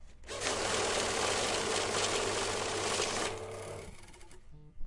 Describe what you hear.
Money Counter counting out 50 notes in rapid succession. Recorded inside a office using Rands as the chosen Currency. motors continue to make noise for a second after the money has been counted. Recorded using a Zoom H6 Recorder
BUSINESS, COUNTING, DOLLER, FINANCE, MONEY, MONEY-COUNTER, OWI, WEALTH